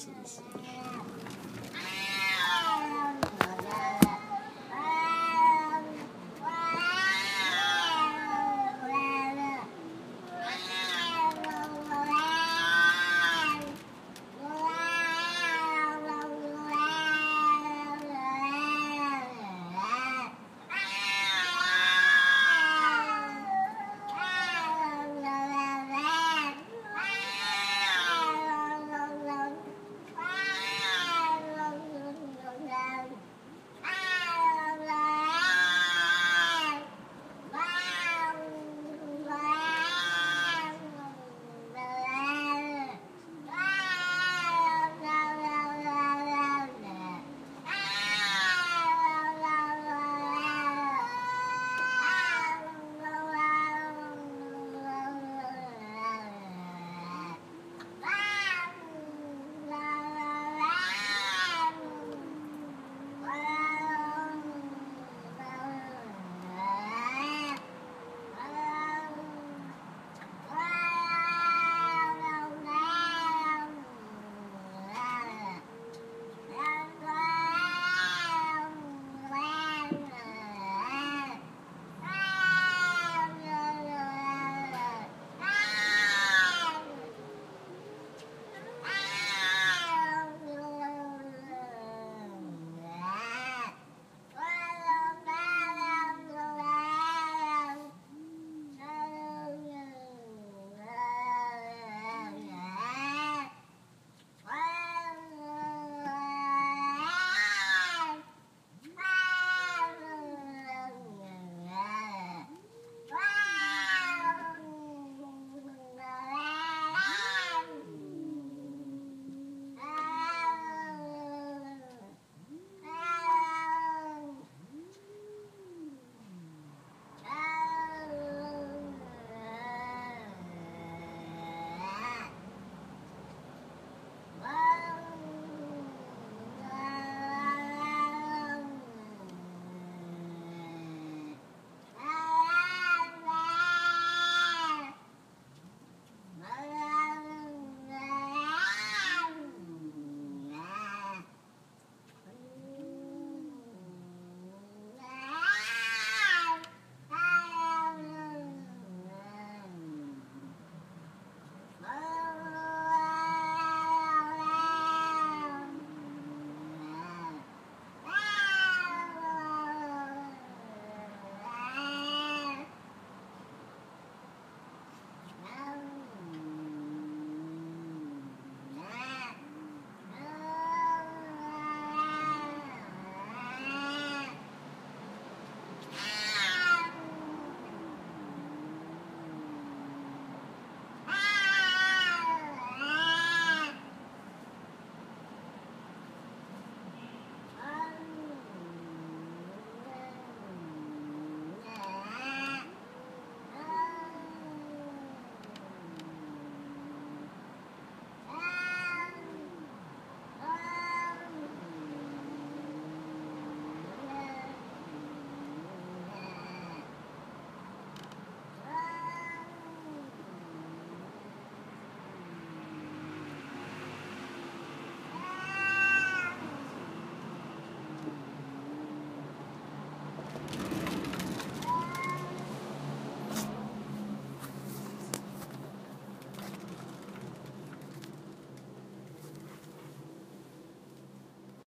2 cats having an argument